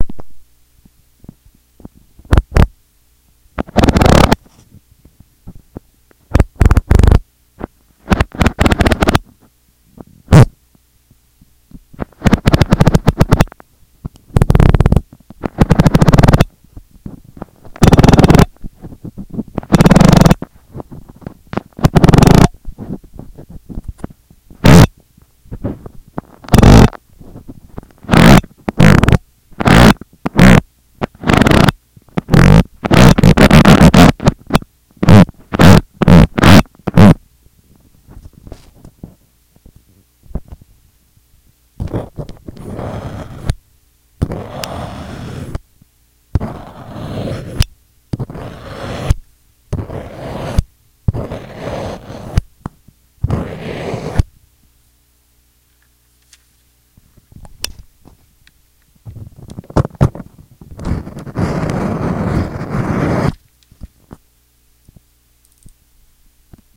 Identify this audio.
touching a paper cutter
ambient, contact-mic, perception